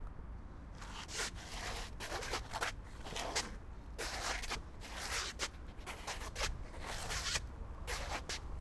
Shuffling 1 Rear
A small group of people shuffling, to replicate idling zombies.